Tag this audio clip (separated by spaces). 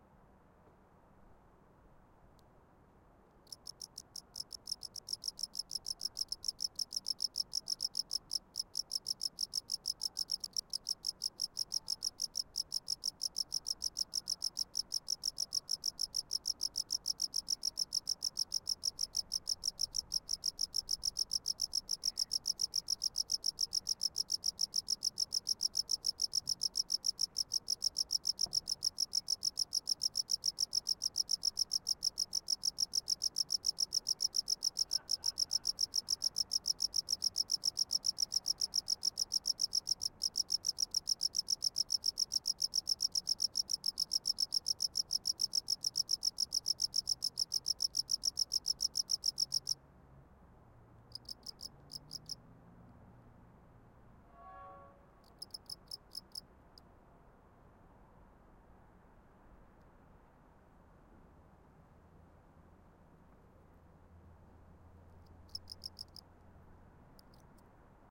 recording atmophere soundscape field ambience ambient general-noise